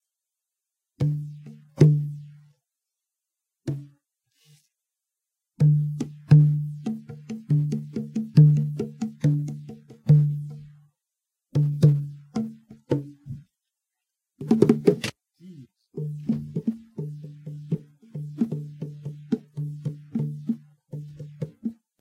This is just me banging on my bongos. It's really just sampler food.